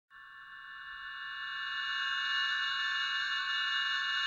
Medium, high pitch resonating hum.